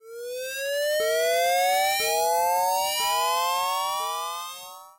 Ibert Xavier 2012 13 son1
Made using Audacity (only):
Generate 1 wave form
sawtooth start from 440 Hz to 1320 Hz
apply a fade in fade out effect
Apply a Phaser
stages : 2 dry/wet 128 LFO Frequency 0.4 LFO Depth 100 feedback 0
Echo
Delay time 1 Decay factor 0.5
Morphologie:
-Masse: mélange de noeuds et de sons tonique
-Timbre harmonique: Son brillant/puissant et agressif adouci par les fondus
-Grain: son rugueux
-Allure: relativement instable, léger effet de pompe dans le son
-Attaque: Faible à cause des fades in & out
-Profil mélodique: Son continu varié, variation serpentine
-Profil de masse: Son calibré grâce au phaser
Typologie:
Continu varié V